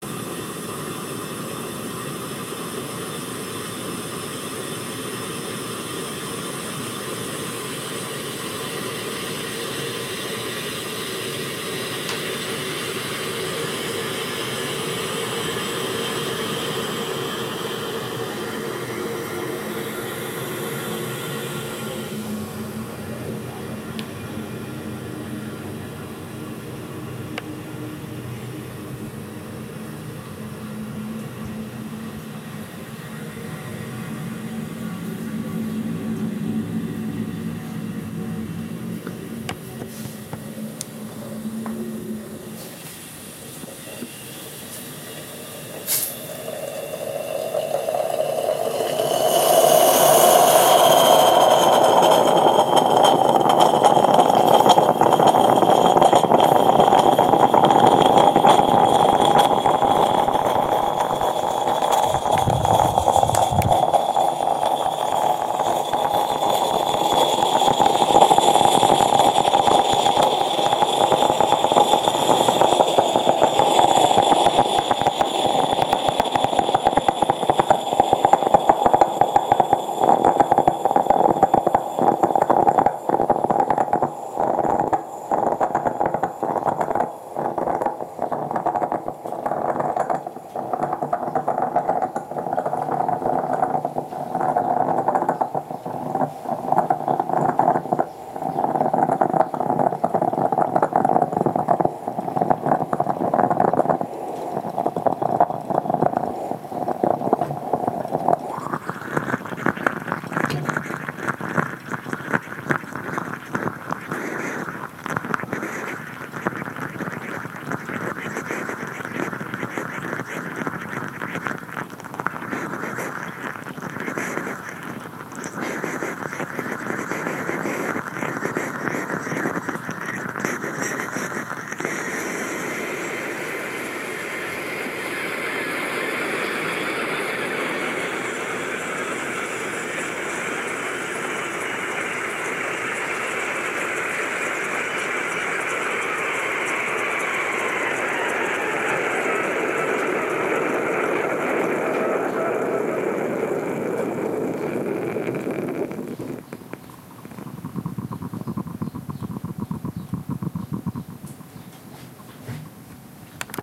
Zoom H4, Sony MDR7509, Wavelab 5 sound editing
My favorite italian coffee-maker, with surrounding movements of the mics, even with the vapor. Very organic sound. Enjoy.
house, bouillant, vapor, cafetiere, coffee, recording